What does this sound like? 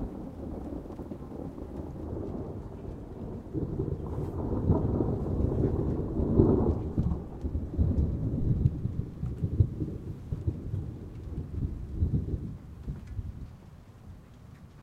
ThunderSound (2), recorded with my Blue Yeti Microphone.